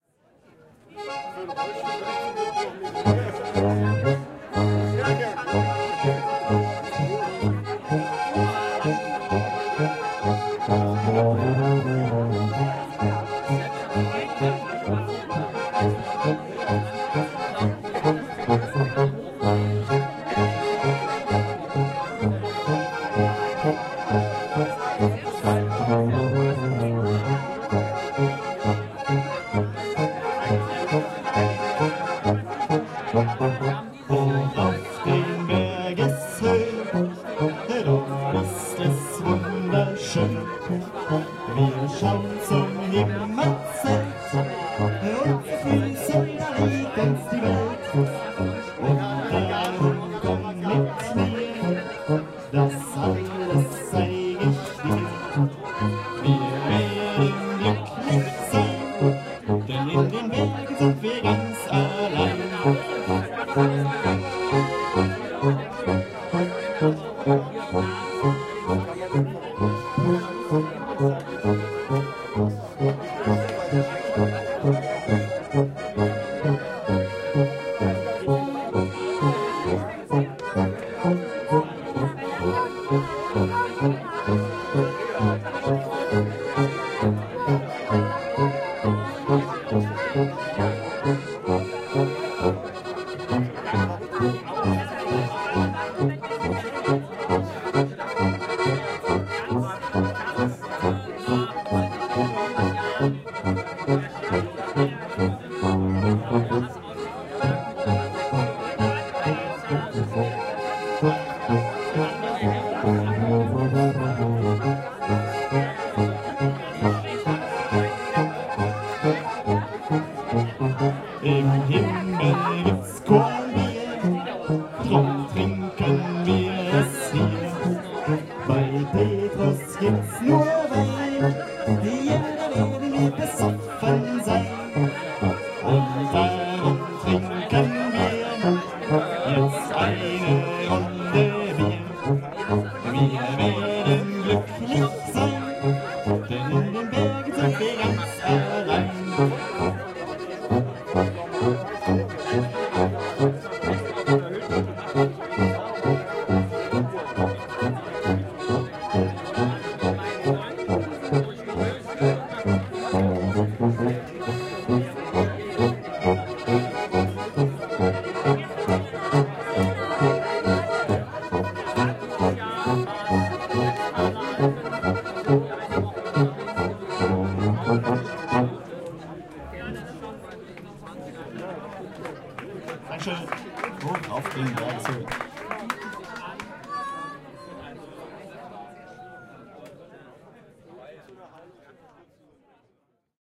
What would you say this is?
traditional bavarian folk music2
People sitting in the backyard of a bar in a small village in the center of the Eifel mountain region of western Germany, talking and listening to a two man band (brass tuba and akkordion) performing typical folkloric tunes of southern Germany and the Alps.Zoom H4n
pub, beer, band, openair, folkmusic, Switzerland, akkordion, Bavaria, fieldrecording, brass, Austria, bar